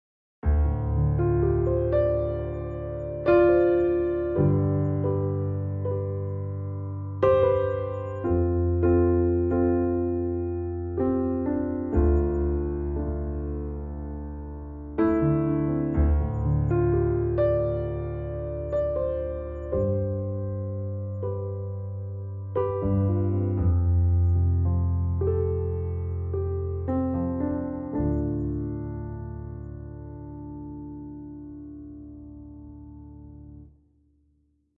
Piano Noodling in Dm
chords, D, melodic, minor, piano, sustain, sustained